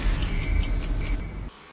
LEPROUX JEANNE 2018 2019 Perceuse
"Perceuse" : Recorded sounds : birds noise, o'clock noise and drill sounds. The o'clock and birds noises are most heavy than the sound of drill because it can be unreachable for ears so i down the stereo.
Elementary, internet, type